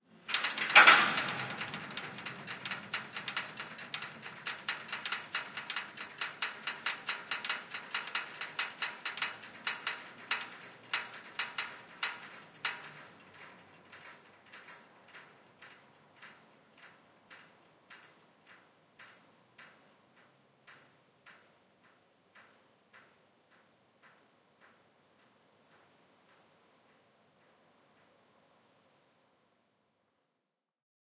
partition grid in a catholic church
Sound of moving metal grid in a church. Recorded with edirol R-09 and external OKM stereo mic.
ambience, field-recording, grid, metal